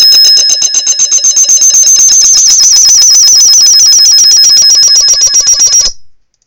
Some feedback. My CA desktop microphone caught this, when it got way too close to the speaker. Very loud and unpleasant! I meant to upload this months ago!
mic, loud, unpleasant, squeaky, feedback, bad-sound-guy, noise, microphone, annoying